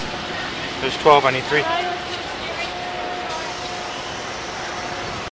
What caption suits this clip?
newjersey OC wundertickets
Discussing tickets at Wonderland Pier in Ocean City recorded with DS-40 and edited and Wavoaur.